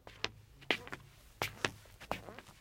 Passos arrastados
walking, draggerd, step, ground, foot